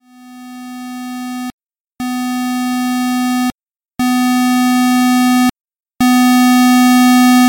burg guillaume 2012 13 son2
Alarm Clock sound
Cheminement :
Son carré (Fréquence 250 Hz, Amplitude 0.8) + fondre en ouverture + changer le tempo + amplification (-5 dB)
Typologie :
Itération tonique
Morphologie :
Masse : son seul tonique mais répété
Timbre : bruyant, froid, impersonnel, stricte
Grain : lisse
Dynamique : L’attaque est violente mais graduelle grâce au fondu en ouverture
Profile mélodique : variation Scalaire
Alarm, clock, gradual